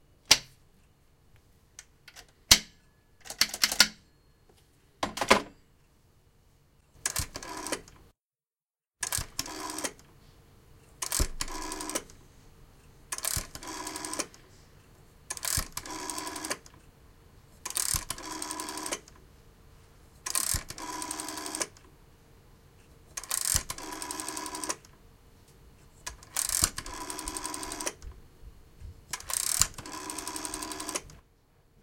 Telephone Dialing
This is a recording of an old black rotary telephone sounds. The sounds are first the handset being lifted, then the top switches being pressed, then the handset returned. This is then followed by the dial sounds starting with 1 then going all the way through to 0.
By editing using the sounds a complete telephone process can be created.
Recorded using ZOOM H2
british-telephone-dialing-sounds, old-style-telephone-sounds, rotary-telephone-sounds, telephone-SFX